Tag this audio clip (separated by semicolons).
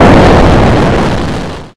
Explosion; request; speak